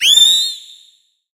Moon Fauna - 62
Some synthetic animal vocalizations for you. Hop on your pitch bend wheel and make them even stranger. Distort them and freak out your neighbors.
sound-effect, synthetic, fauna, sci-fi, creature, vocalization, animal, alien, sfx